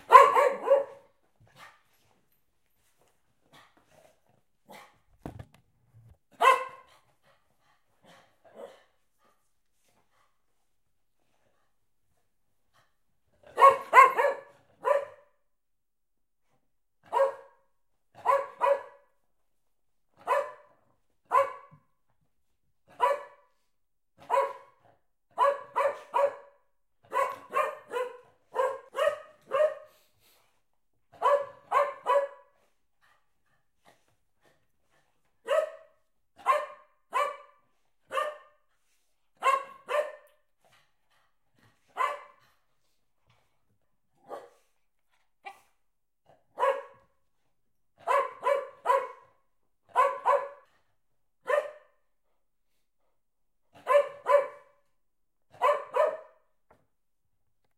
Dogs barking, Recorded with Zoom H4n Pro internal mic.
animals, bark, barking, dog, dogs